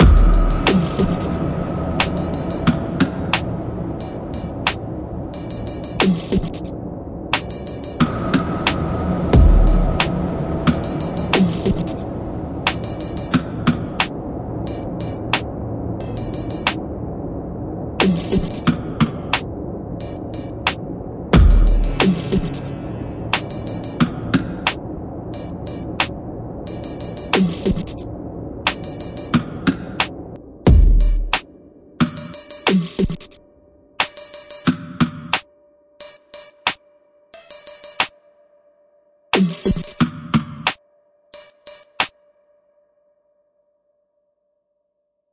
A futuristic trap beat, with fx over drums.
percussion, beat, trap, loop, 90-bpm, futuristic, drums